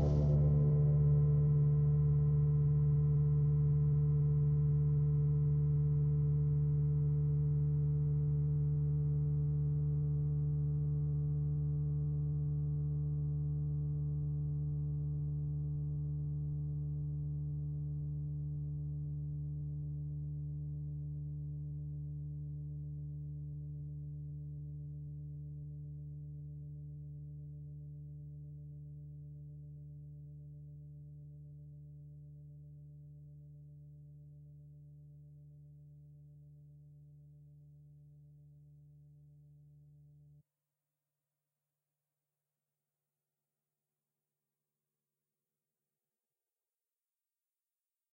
Drone 1 Low
Just a cool fade-out for a project I was working on. Stringish, with a lot of reverb. Pitch-shifted down an octave. A D note.
D, Drone, Fade, Fade-Out, Note, Out, Pad, Synth